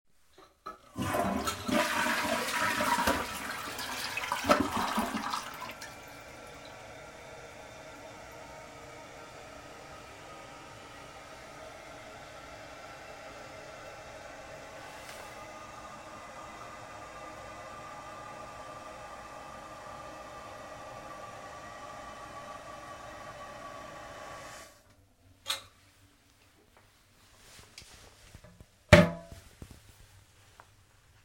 30 seconds of a toilet flushing and filling it's tank
bathroom, flush, household, toilet